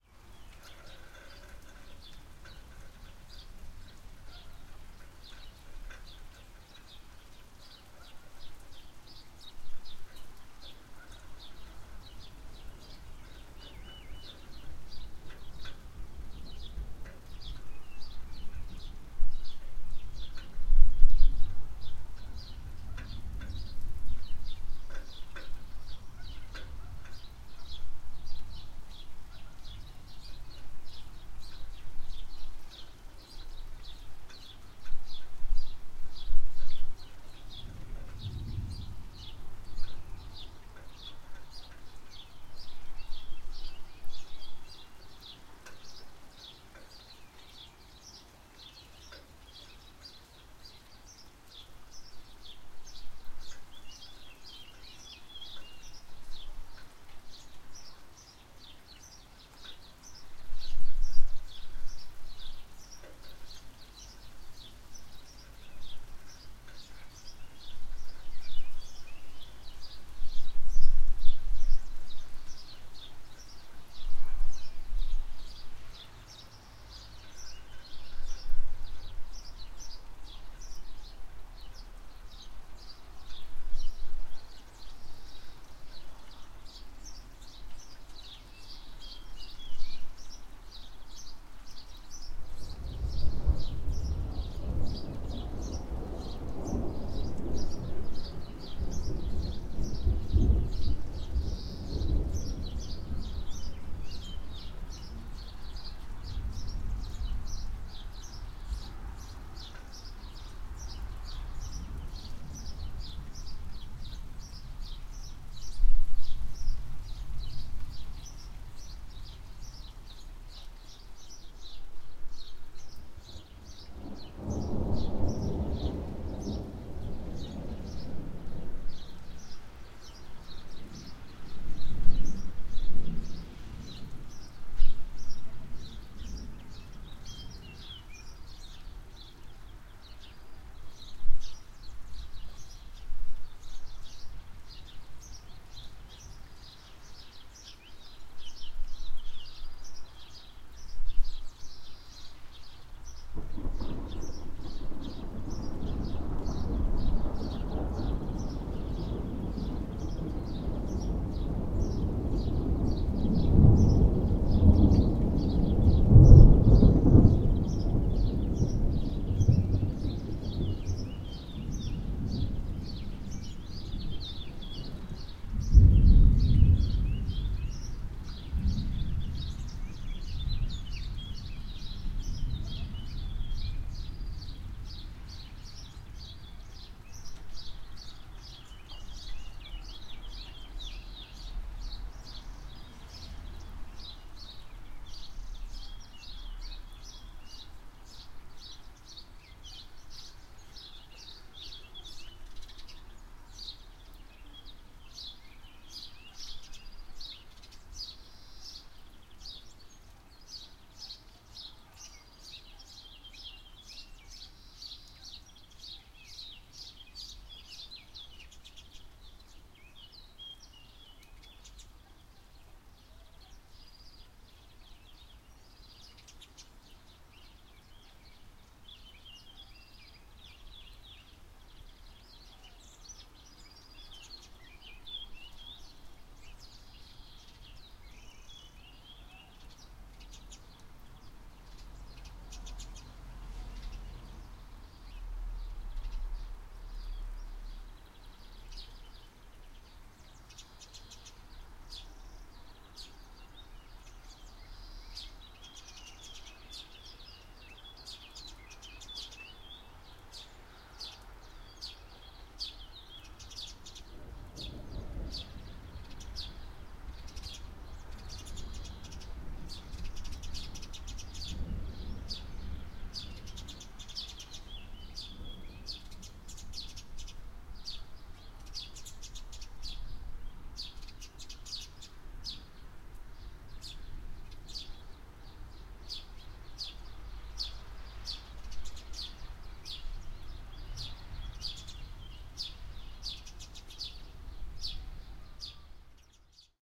birds mild rain thunder rode NT1000
Mono recording with Rode NT1000. It's done indoors, by the window, with the window open, pointing outside. In the first plane you'll have birds (Portugal), then mild rain and some far away thunder. Some thunders are closer, pretty clear and loud. Be ware for some of my movement sounds, you may need to edit.